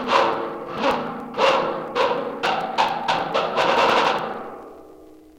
Mesh Basket 4
Some more scrapes on a mesh basket.
concrete, found-sound, hit, hits